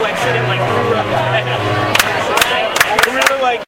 Field recording of that thing people do where they go dun dun dun dun dun clap clap clap.